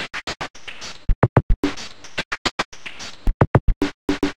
crunchy beat
Crunchy little beat, pretty dirty and distorted sounding.